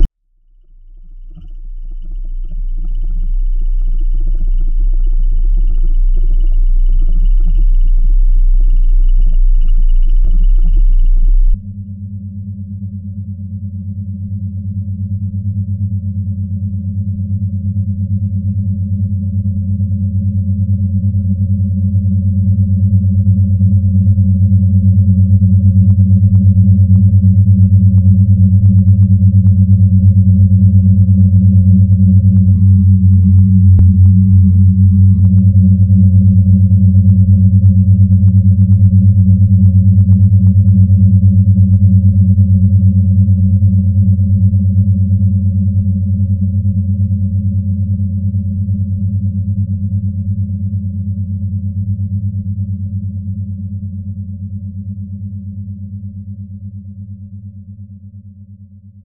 I love the sound of a struggling heavy freight train pulled by two to three powerful diesel locomotives. I have no own records (yet), so I tried to make something with my simple equipment. Yes, you are right, you hear clearly that it's not a genuine train. Waite and see, one day you'll swear I recorded a true train......
car, diesel, engine, freight, locomotive, railroad